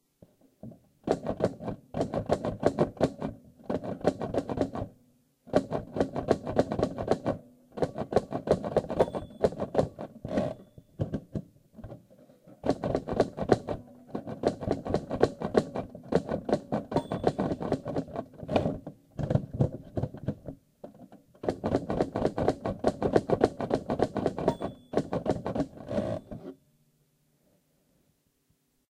antique typewriter
80 year old Remington, microphone a bit from typewriter